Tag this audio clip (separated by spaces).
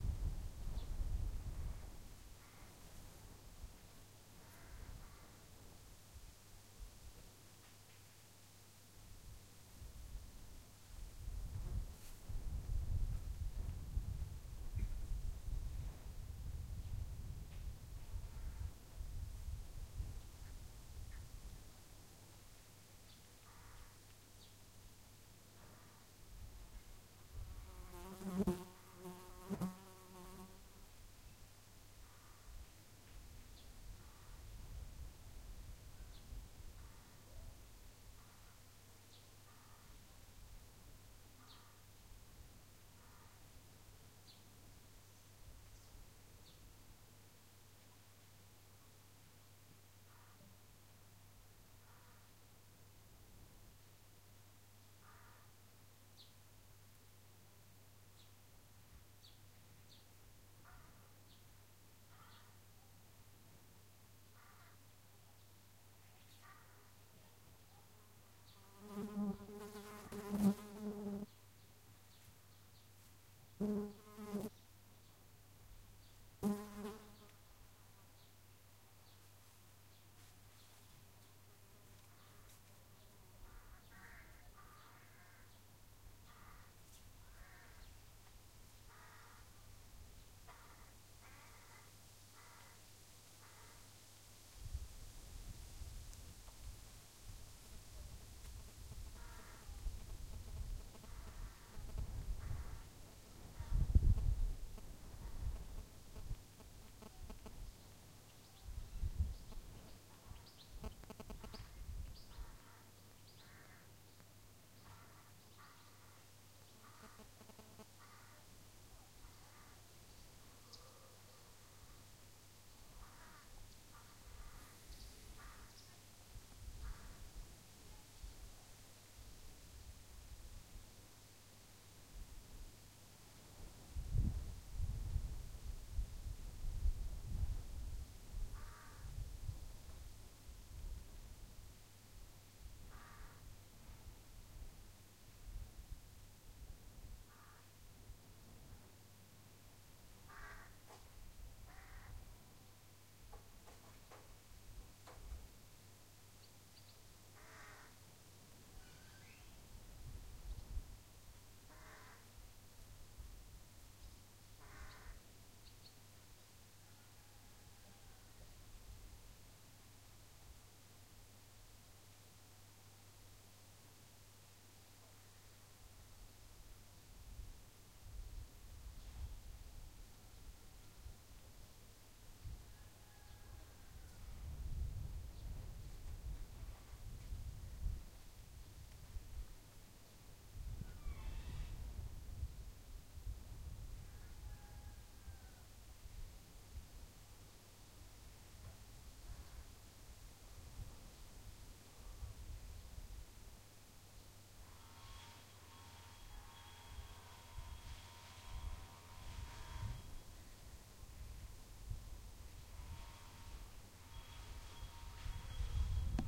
ambience ambient birds field-recording fly midday quiet raw village